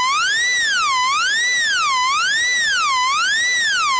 1khz to 2kHz saw sweep
A continuous sweep between 1khz en approximately 2khz of a saw-wave, like an LFO. Sound like an alarm, made with Reaper.